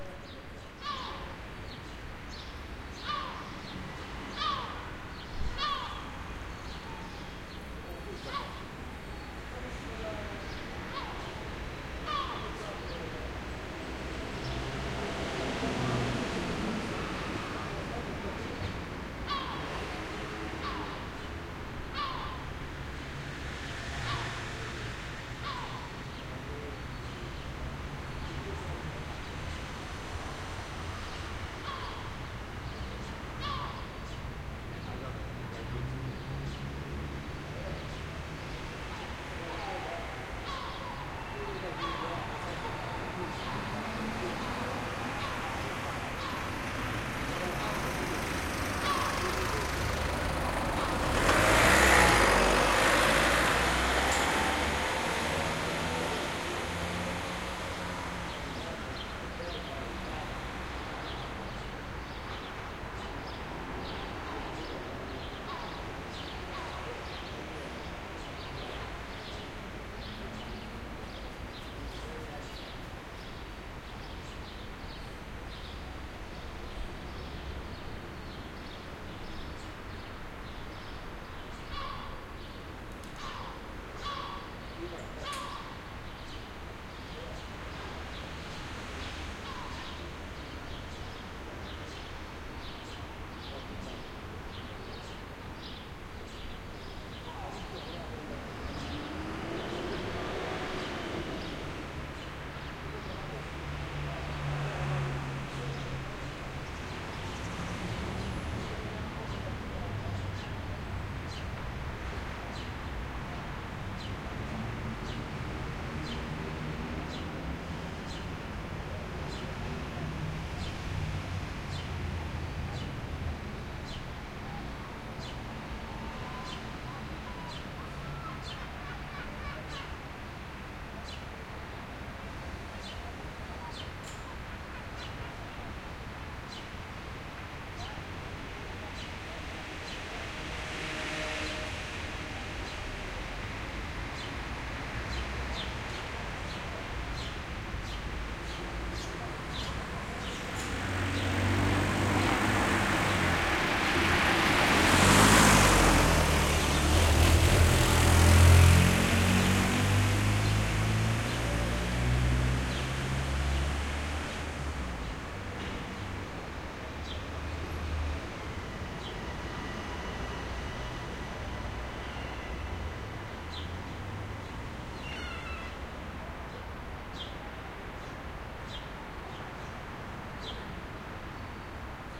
traffic medium distant port Marseille, France +birds seagulls and occasional close throaty car pass MS
distant, France, Marseille, medium, port, traffic